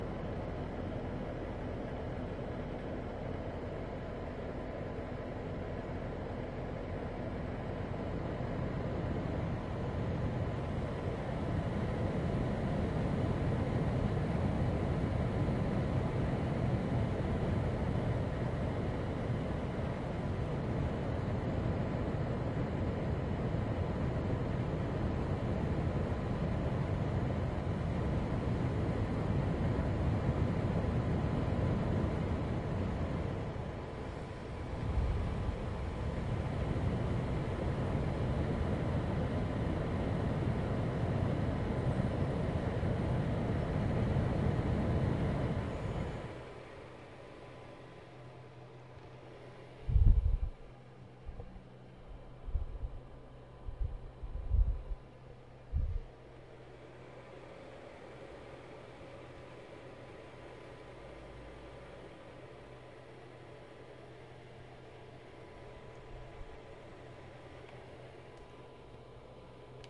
ventilador de mesa
small indoor air fan, like the ones you put on your desk...
Ventilador, Air, Fan, abanico